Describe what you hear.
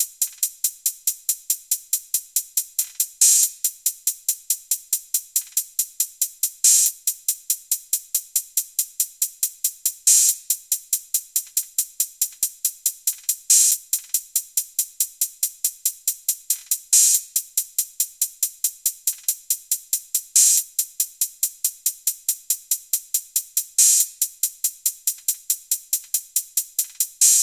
140-hi-hat loop
hihat, hi-hats, hihats, loop